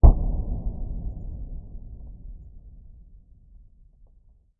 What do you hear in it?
Knall4 1zu8
a systematic series: I´ve recorded the pop of a special packaging material several times with different mic settings. Then I decreased the speed of the recordings to 1/2, 1/4, 1/8 and 1/16 reaching astonishing blasting effects. An additional surprising result was the sound of the crumpling of the material which sound like a collapsing brickwall in the slower modes and the natural reverb changes from small room to big hall
bang, bounce, crack, knall, pop, puff, smack, smacker, snapper, whang